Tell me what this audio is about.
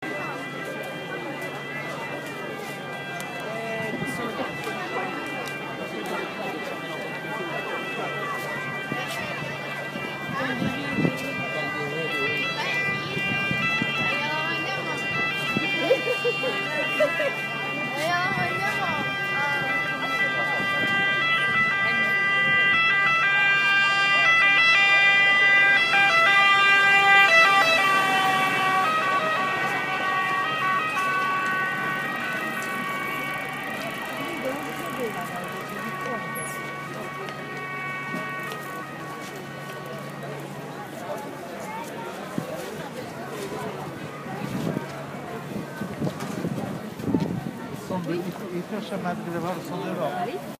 Rome Ambulance
Ambulance pass-by in Rome
ambulance, city, field-recording, Rome, street, traffic